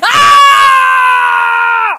effort scream
Scream of a man recorded with an Iphone 5 at University Pompeu Fabra.
shout, human, male, effort, voice, scream, man, vocal, 666moviescreams